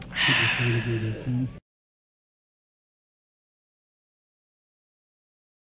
Recorded onto a minidisc at a protest to save Anvil hill in Australia from more mining endevours. The after sound of a man drinking mineral water.
water, raw